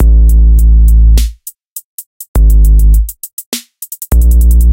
dark hip hop trap drums
a dark hip hop drum-loop made using FL Studio's Fruity Drumsynth Live (analog modelling drum synthesizer), using FL Studio as my host DAW.
bassy, beat, drill, drum, drum-loop, electronic, hip-hop, loop, low-end, raw, rhythm, south, swag, trap